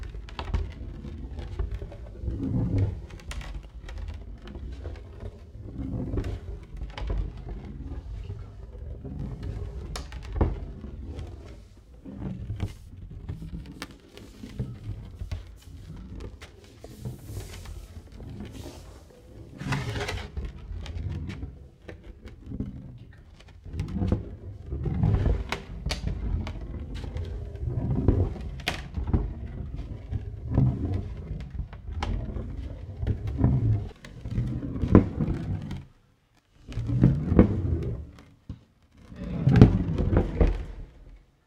Rumble BowlingBall
Rolling around a bowling ball on a piece of plywood. Good rumble sound effect. Mono recording from shotgun mic and solid state recorder.